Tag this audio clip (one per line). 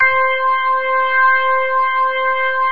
organ
rock
sample
sound